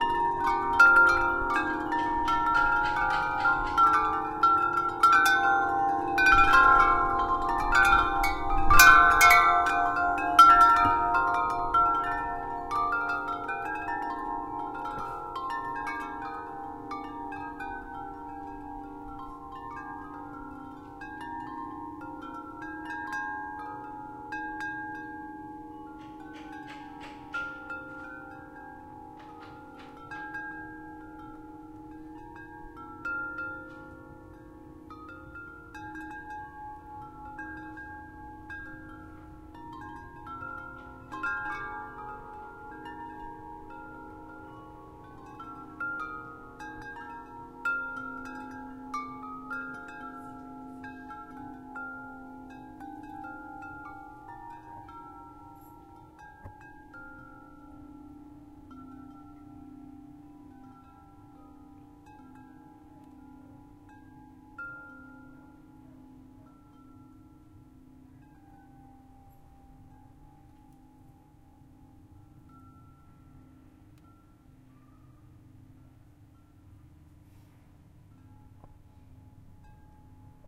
This is a recording of large wind chimes in my backyard. I used a Zoom H6 with the mid side mic.